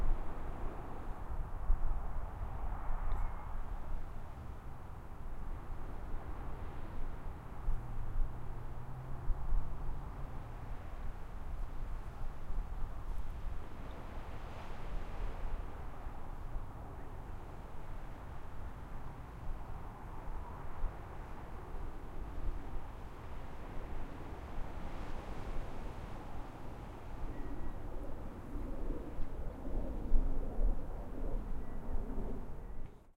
Staten Island South Beach Ambiance (facing land)
Ambiance of Staten Island's South Beach, with device facing the land and a distance boulevard (Father Capodanno Boulevard). The Atlantic Ocean sprawls behind the recording device. Fades off as an incoming plane nears (South Beach falls under Newark airspace).
Edited to remove wind noise.
Recored on 01/07/2021 with an H4n.
distant, NYC, laps, lapping, ambiance, Staten, ocean, distance, Atlantic, field-recording, beach, Island, South, waves, street, boulevard